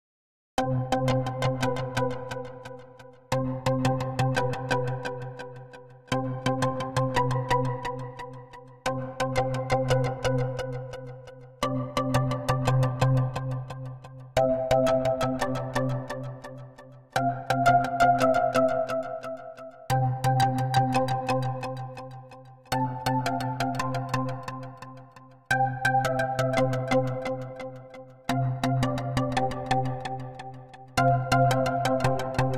Wood echo
A breezy wood sound that has been recorded through a MIDI keyboard in a sequence of chords.
Echo, chord, Breezy, Atmosphere, midi